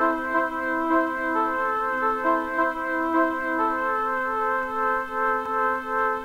Quilty's 4-Peice Orchestra 4
Similar to #1 but (softer) different in a way. Just another synthy thingy.
ambient, melody, new-age, orchestral, sad